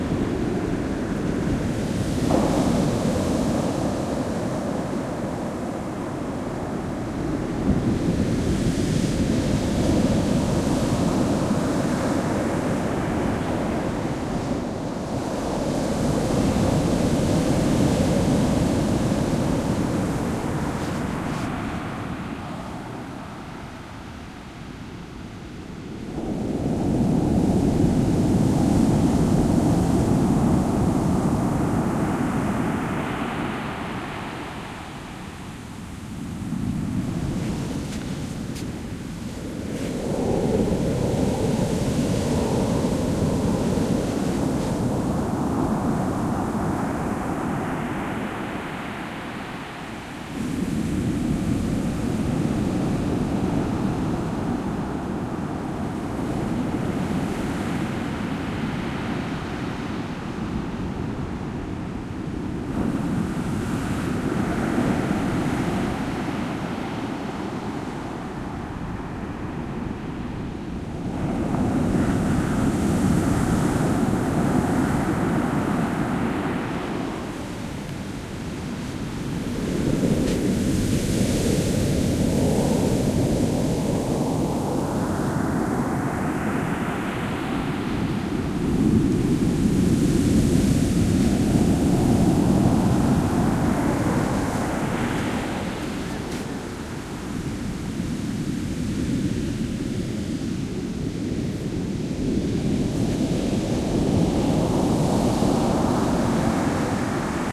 Ocean waves rolling, roaring and breaking on the vast Reynis Beach (near Vik, S Iceland). Shure WL183, FEL preamp, Edirol R09 recorder